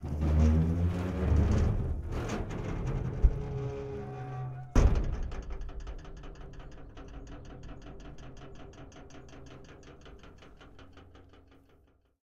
metal gate 09
Large metal gate squeaks rattles and bangs.